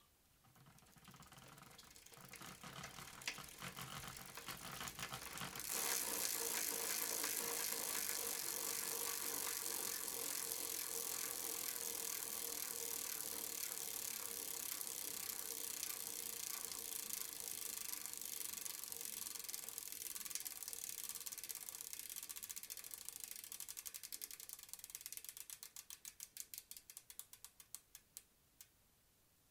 Bike wheel 1
Bike wheel recorded with an AKG 414 through Apogee Duet.
bicycle, bike, chain, foley, pedaling, ride, rider, wheel